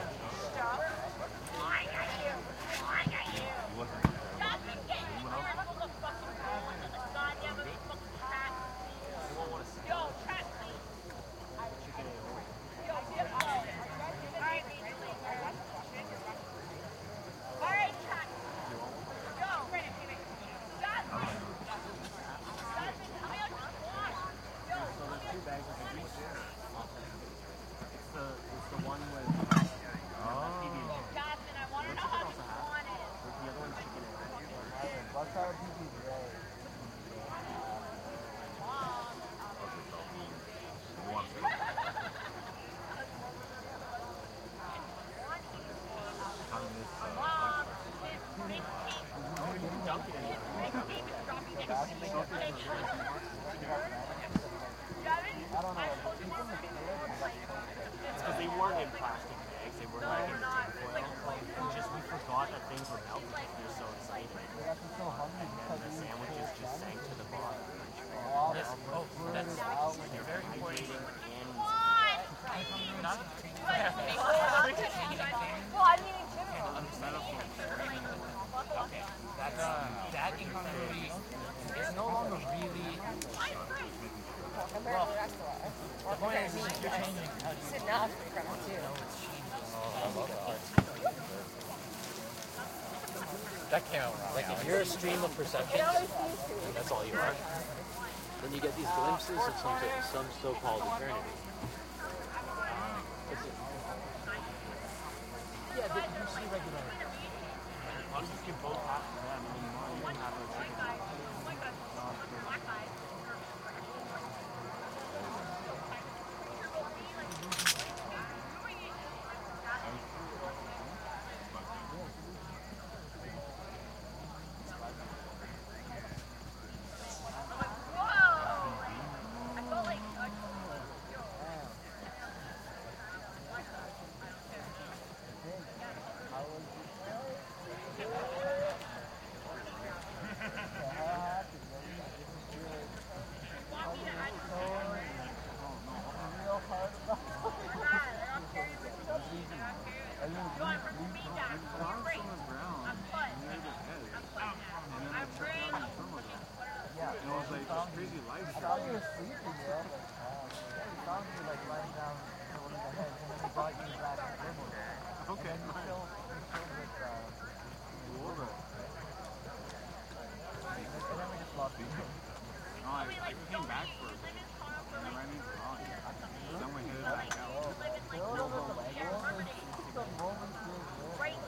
crowd ext young campers Harvest music festival nearby conversations wide perspective with night crickets Ontario, Canada
perspective, music, festival, crowd, campers, Canada, crickets, young, night, Harvest, wide, ext, Ontario